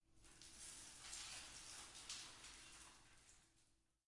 Gravel Toyon Stairs
This recording is of gravel being dropped on the stone stairs of Toyon dorm at Stanford University